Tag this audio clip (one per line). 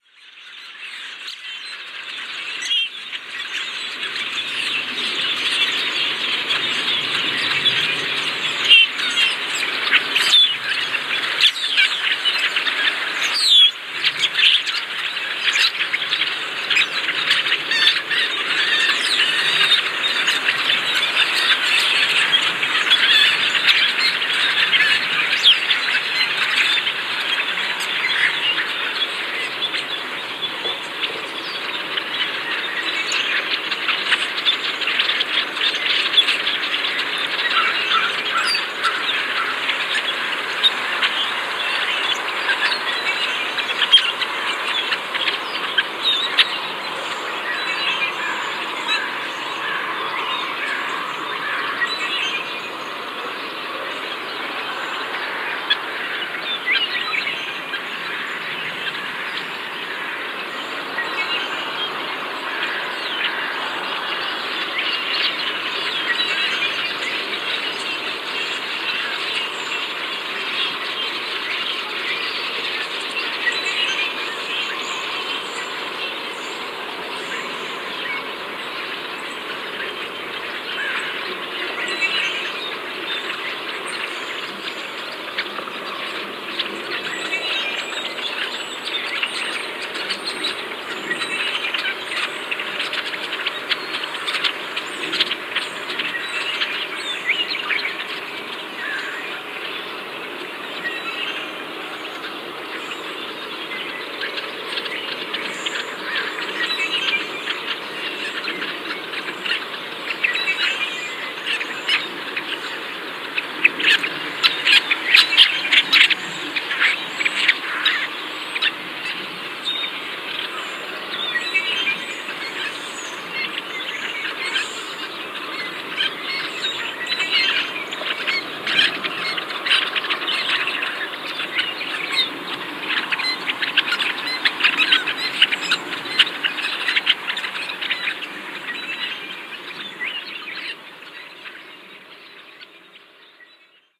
agelaius-phoeniceus
sherman-island